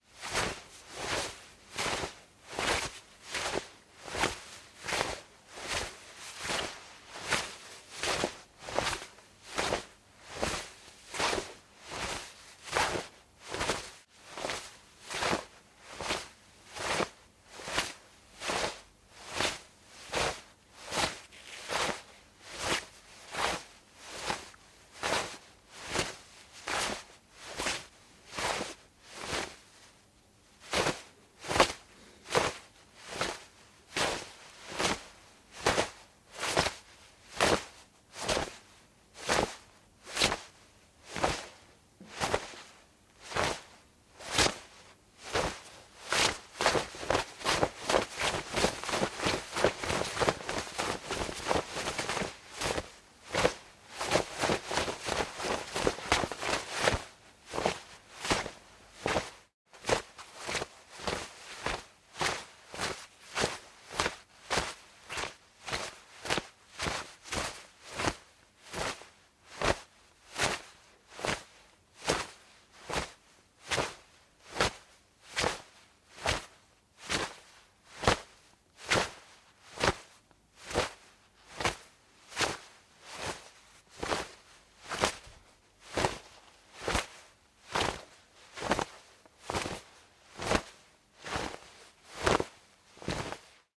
Cloth Rustle 8
Cloth, Foley, Movement, Rustle